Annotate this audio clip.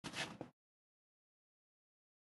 9. Cogiendo papel
cogiendo papel foley